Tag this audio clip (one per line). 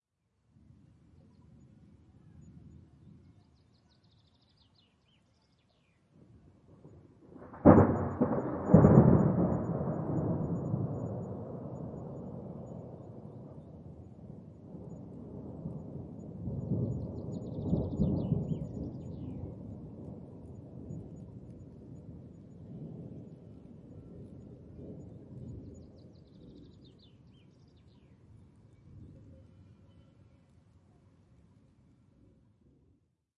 field-recording
nature
thunder
thunderclap
weather